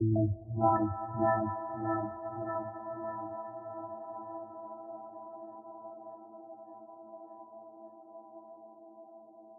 KFA20 100BPM
A collection of pads and atmospheres created with an H4N Zoom Recorder and Ableton Live
ambience, atmospheric, calm, chillout, chillwave, distance, electronica, euphoric, far, melodic, pad, polyphonic, soft, spacey, warm